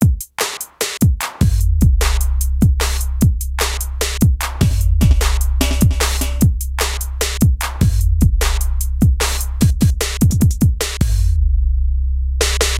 909pattern 150bpm
Clap yo hands!
909, Claps, Snares